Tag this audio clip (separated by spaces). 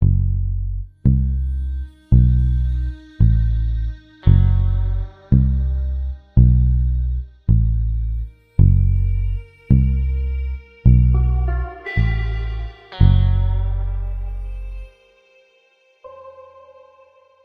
background-sound creepy crime delusion drama fear fearful frightful ghost Gothic grisly halloween haunted hell horror instrumental macabre mysterious nightmare phantom satanic scary sinister spooky suspense terrifying terror thrill